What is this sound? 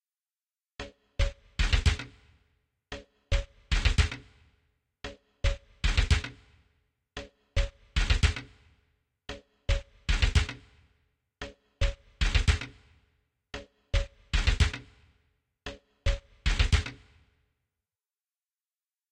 self made drum loop 3
loop, rhythm